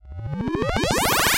Loading Energy Basic Retro Sci-Fi Dry
Created using a Doepfer A-108 VCF8 using heavy filter modulation at with two mod sources.
An LFO and a regular oscillator.
Recorded and edited in ocenaudio. October 2016.
It's always nice to hear what projects you use these sounds for.
One more thing. Maybe check out my links, perhaps you'll find something you like. :o)
60s, 70s, analogue, basic, dry, electronic, element, energy, filter-modulation, fx, loading, machine, modular, power, retro, science-fiction, sci-fi, ui